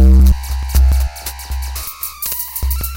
FLoWerS Viral Denial Loop 007
A few very awkward loops made with a VST called Thingumajig. Not sure if it's on kvr or not, I got it from a different site, I forgot what though, if you find it please link to it!
loop, noise, strange, weird